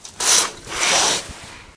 Curtains Opening or Closing 3 Short
I'm opening or closing curtains on a rail. Short. Recorded with Edirol R-1 & Sennheiser ME66.
close, closed, closes, closing, curtain, curtains, open, opened, opening, opens